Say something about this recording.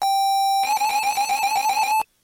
sample of gameboy with 32mb card and i kimu software
boy, game, layer